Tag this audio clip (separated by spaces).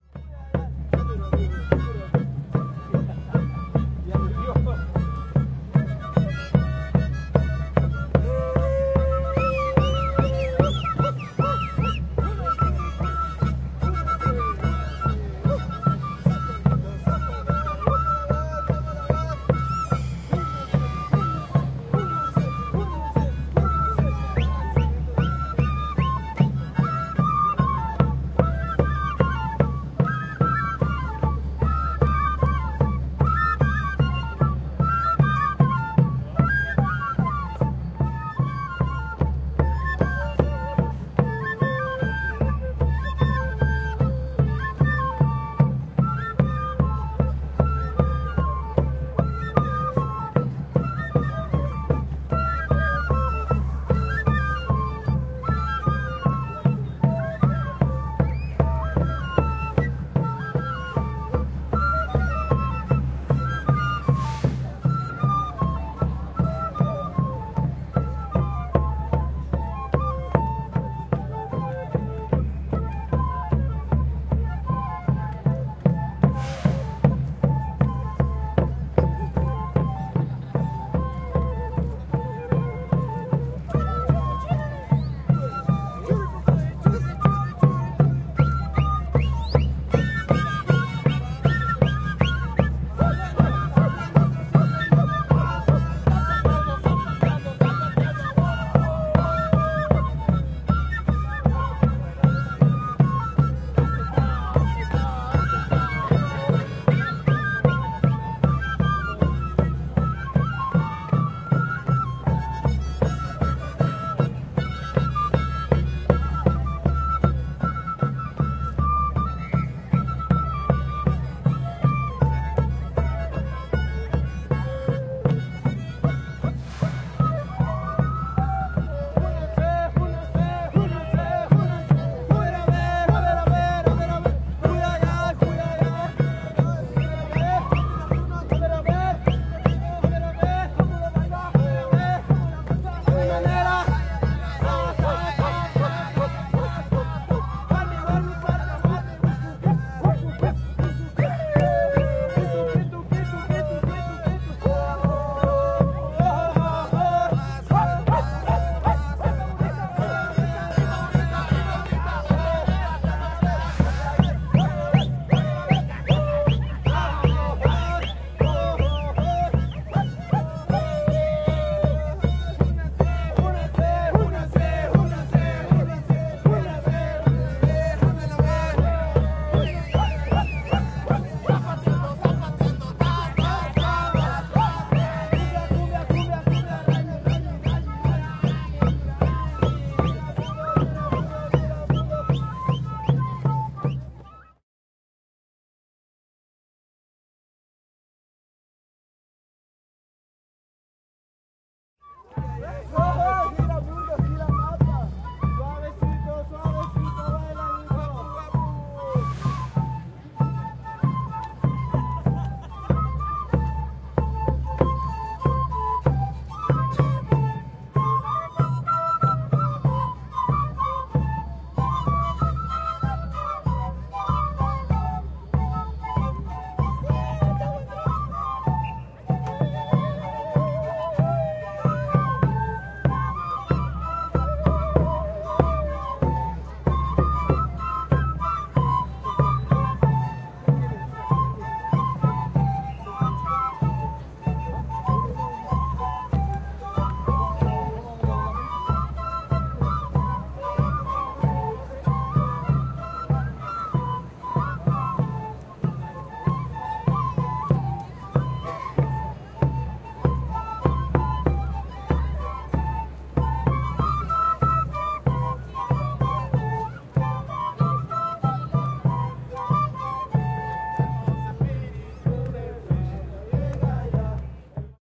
ambiance ambience ambient atmo atmosphere background-sound chanting ecuador field-recording idigenas indian-ceremony indians jungle latin-amerik latin-amerika Mike-Woloszyn people percusion quito soundscape south-america south-amerika street-atmo sur-america Tunk volklore volk-musik Woloszy Woloszyn-Mike